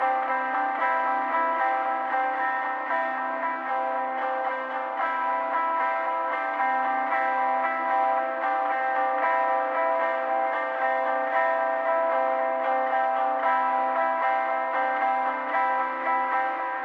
Indie Pop Guitar Loop Delay [114bpm] [C Major]
electric, free, guitar, indie, loop, melodic, pop